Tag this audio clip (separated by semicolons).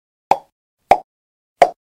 Mouth-pop; Pop